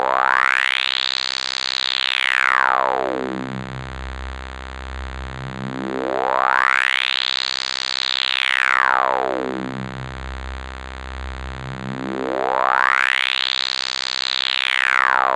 agressive bass
saw, reese, detune